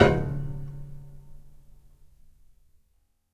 Some paper towel wrapped around piano strings, recorded with Tascam DP008.
Corde grave de piano enroulée dans du papier essuie-tout et frappée de manière traditionnelle par un marteau ! Captés en stéréo par les micros du brave Tascam DP008.
piano, prepared